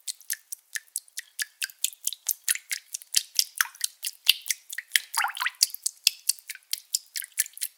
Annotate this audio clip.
Blood Dripping Loop 01
A slimey and dry blood dripping loop sound to be used in horror games. Useful for evil areas where sinister rituals and sacrifices are being made.
blood, drip, dripping, epic, fantasy, fear, frightening, frightful, game, gamedev, gamedeveloping, games, gaming, horror, indiedev, indiegamedev, liquid, rpg, scary, sfx, terrifying, video-game, videogames